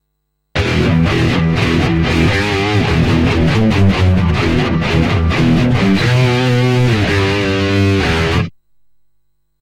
HEavy Guitar
Riff suonato da me.Suono Chitarra duro Heavy metal con Zoom G1Xon.
heavy, rock, rythum, rythem, thrash, metal, guitar, duro